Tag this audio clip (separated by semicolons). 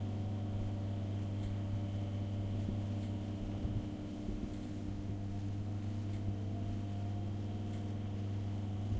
16; bit